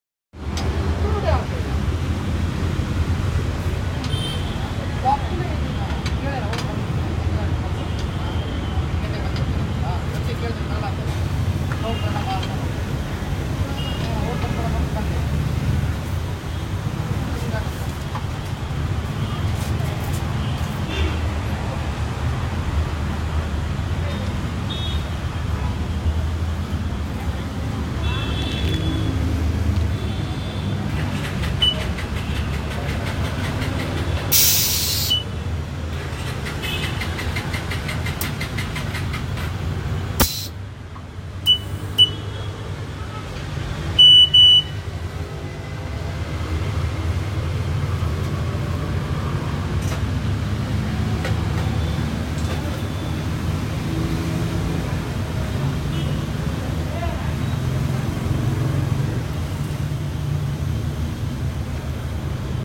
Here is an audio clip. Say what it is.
Sound of air pump at a gas station
Sound of an air pump at a gas station (often known as a petrol bunk or a petrol pump locally) in Bengaluru, Karnataka state, India in a winter evening.
air-pump, Bengaluru, bengaluruconfinementsoundscape, gas-station, India, Karnataka